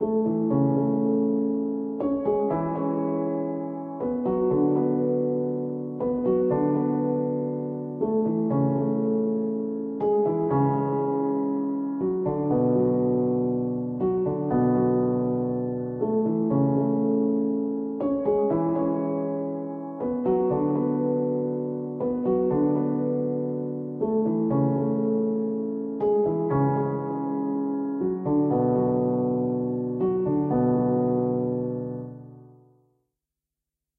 Piano loops 030 octave short loop 120 bpm
120, 120bpm, Piano, bpm, free, loop, reverb, samples, simple, simplesamples